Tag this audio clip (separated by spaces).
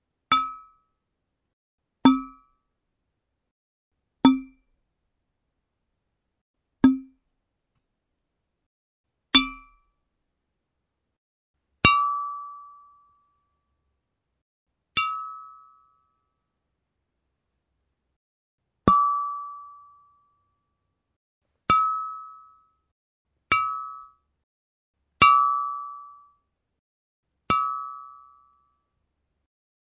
clean close-up cristal